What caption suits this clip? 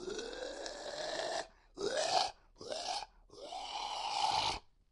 zombie3-multi
A zombie, possibly grasping at the leg of a survivor.
grunt, undead, zombie, ghoul, moan